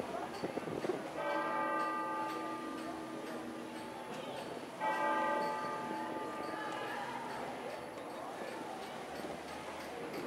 FX - berenguela dando la media
bell, compostela